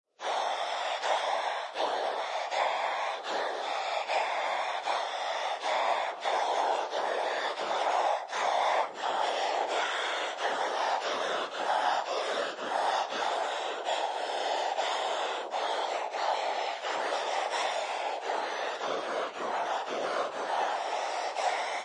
Monster breath. Balkan and Asian folk instrument, GUSLE, through waves morphoder.
horror, exhale, gusle, modulation, breath, monster, air, inhale